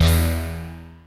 04 Eqx Beezlfs E2
Mapped multisample patch created with synthesizer Equinox.
multi, synth